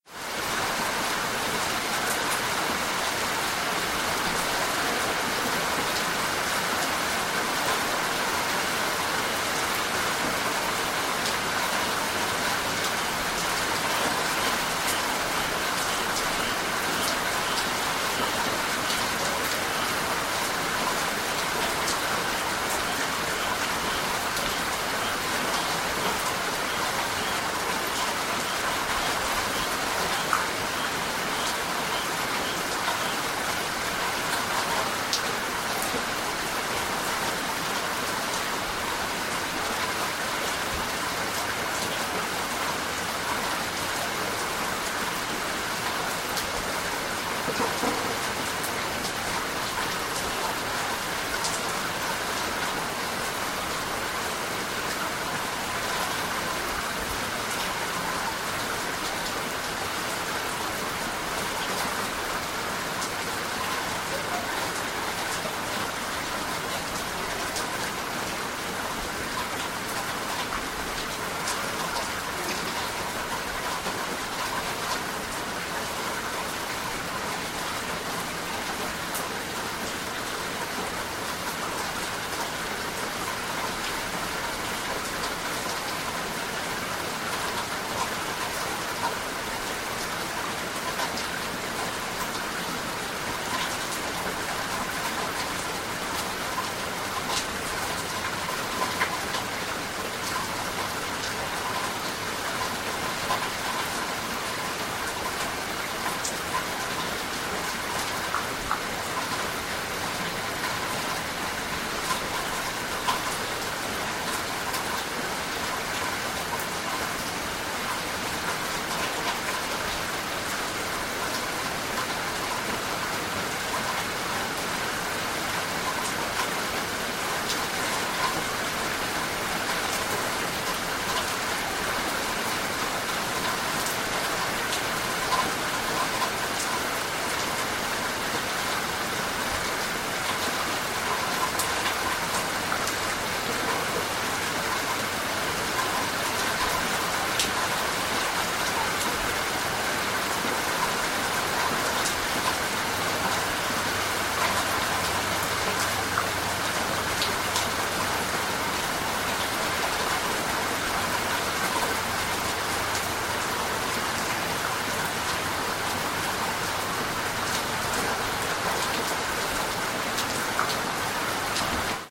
Raw audio of a heavy rain storm. This was recorded in Callahan, Florida.
An example of how you might credit is by putting this in the description/credits: